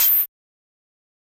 this series is done through layering and processing many samples of drum sounds i synthesised using various plugins namely xoxo's vst's and zynaddsubfx mixed with some old hardware samples i made a long time ago. there are 4 packs of the same series : PERC SNARE KICK and HATS all using the same process.
synthetic, hi-hat, hithat, drums, layered, hats, high, processed, hat, hihat, hh, percussive